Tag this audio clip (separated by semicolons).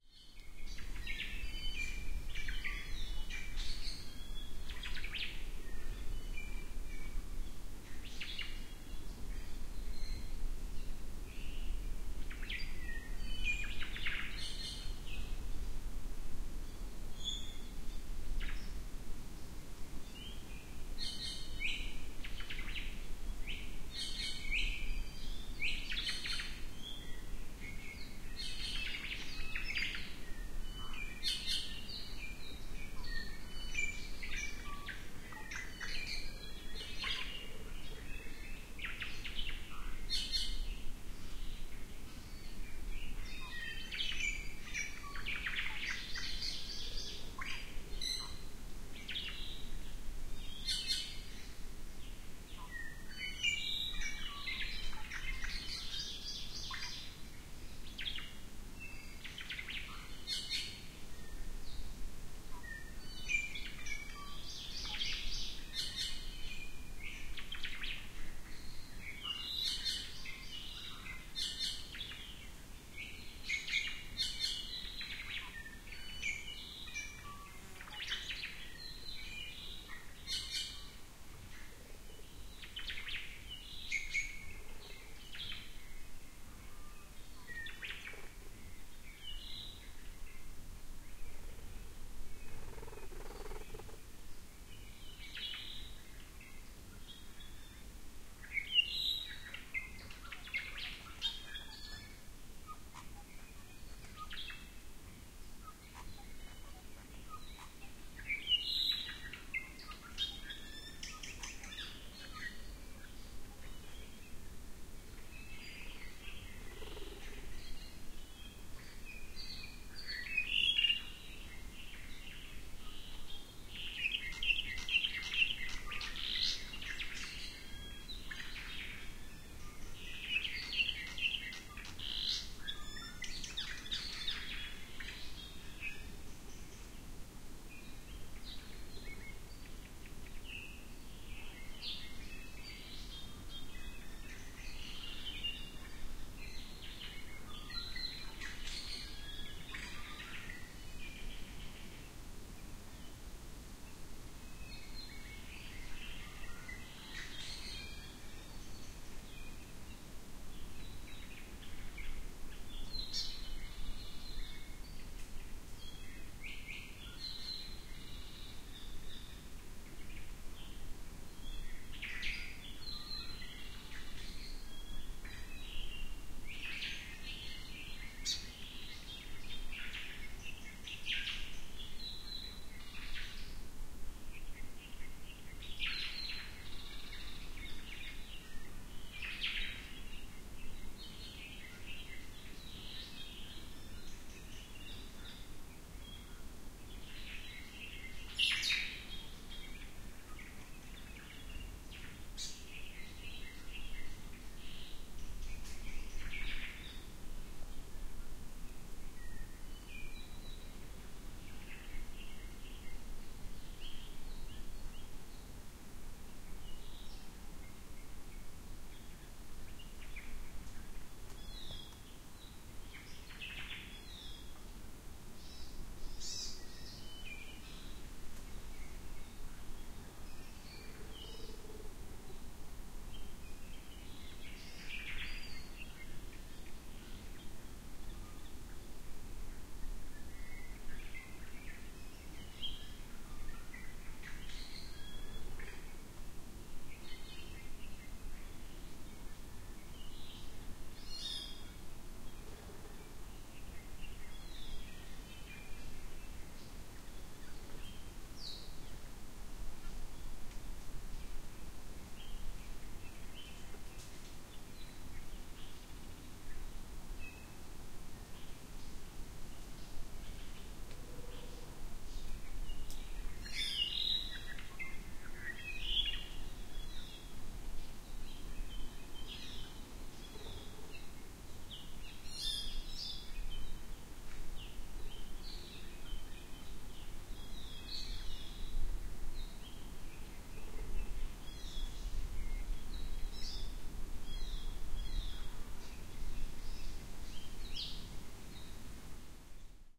Birds; Field-Recording; Forest; Hawaii; Honeycreepers; SASS; Stereo; Tropical